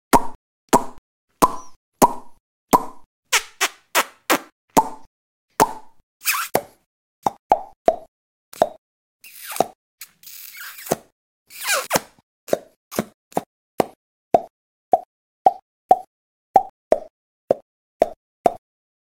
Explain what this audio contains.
Pop and Suck 1
Pop and suck sounds. Various different versions. Made with the mouth but applicable for many other applications. Great for animations, comedy etc...
Audia Technica Series 40 Mic Slight natural room reverb.
cork, popping